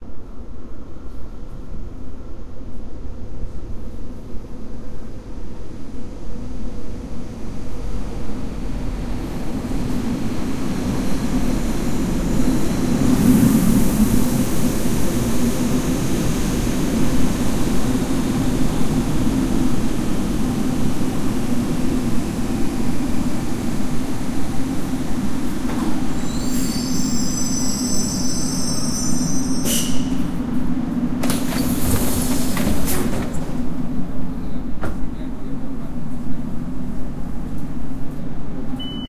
Arrival and door opening a subway train in Rome. I used Tascam Dr-05